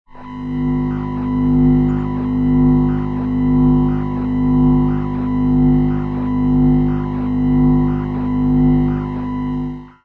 Processed ground loop with bleeps.